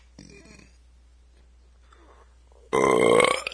Man burping.
Recorded in a kitchen at around midnight.
Recorded with H4N Zoom Recorder.
burping, sound-effect, man-burping, loud-burp, belch, burp